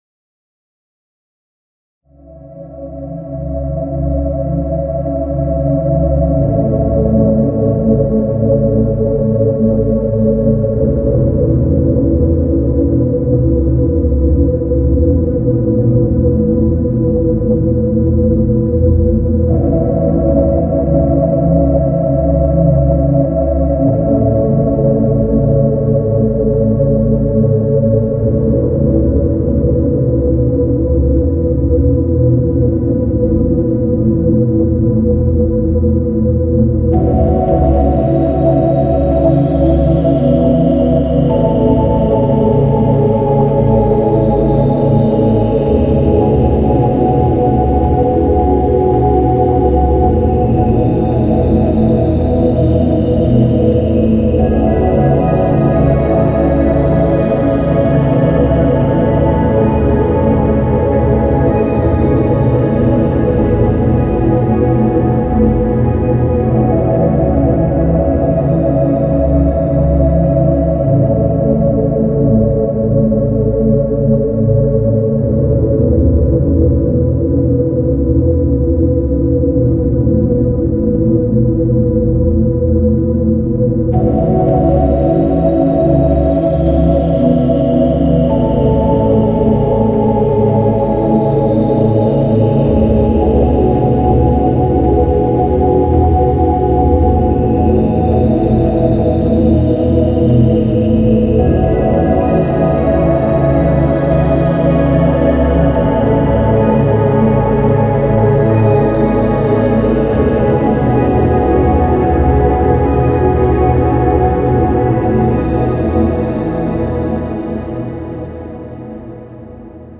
Depressive atmosphere
A short song that I made using Cubase and some plugins.
atmosphere, Depressive, melody